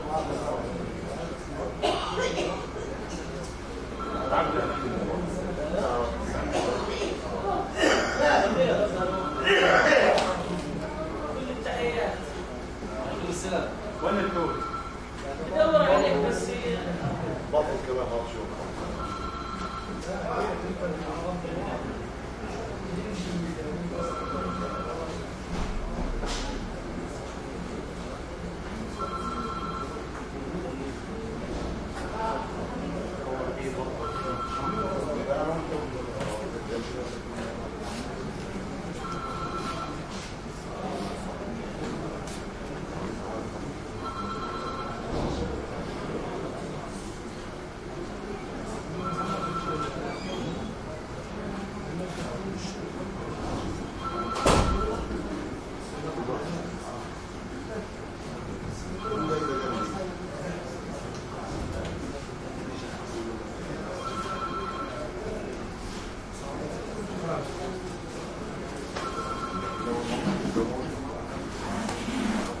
Al Shifa hospital dialysis room light activity arabic voices5 phone ring never picked up Gaza 2016
arabic, dialysis, hospital, medical